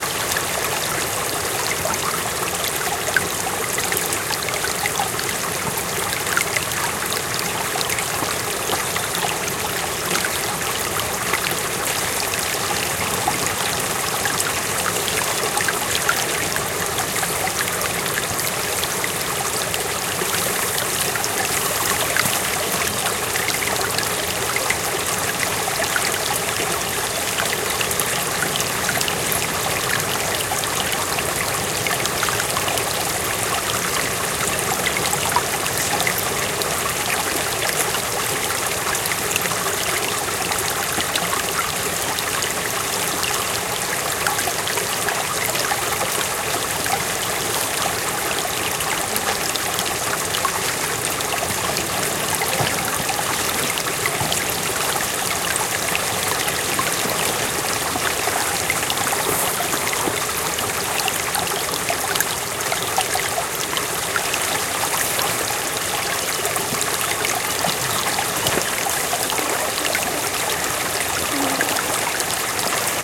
Close take of a water stream in the mountains, birds in background. EM172 Matched Stereo Pair (Clippy XLR, by FEL Communications Ltd) into Sound Devices Mixpre-3. Recorded near Cascada de Mazobres (Mazobres Waterfall), Palencia N Spain.
babbling, field-recording, mountain, nature, stream, water